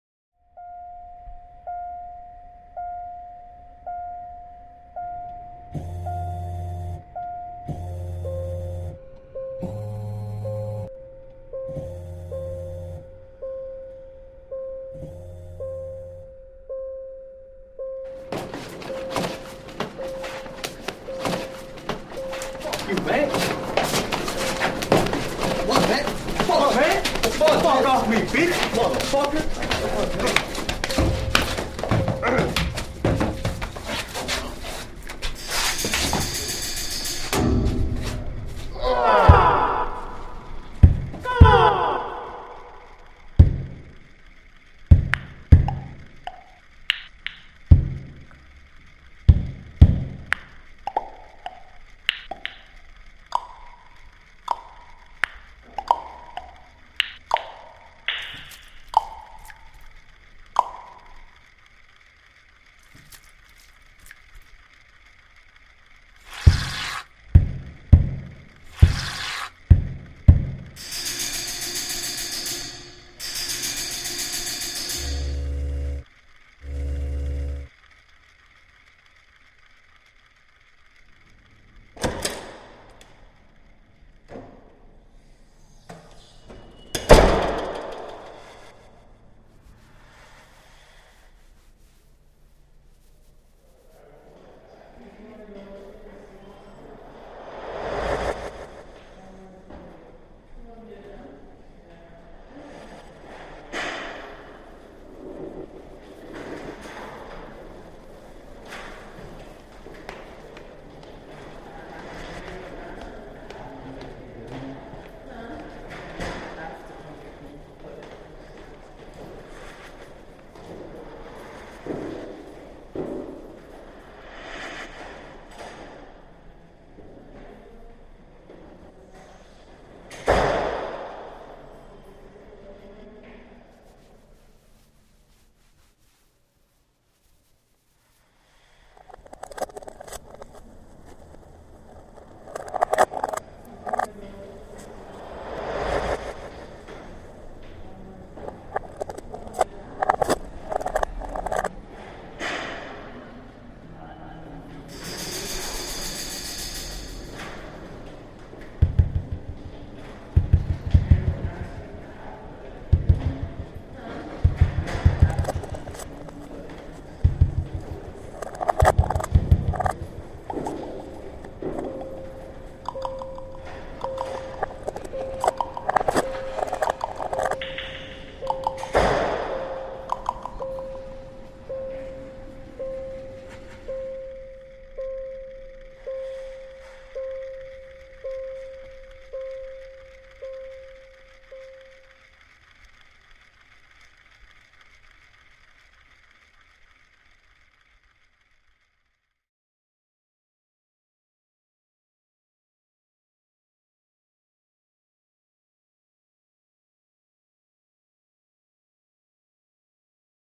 Musique concrete piece
concrete, musique, piece